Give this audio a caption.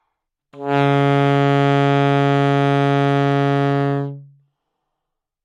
Sax Alto - C#3
Part of the Good-sounds dataset of monophonic instrumental sounds.
instrument::sax_alto
note::C#
octave::3
midi note::37
good-sounds-id::4641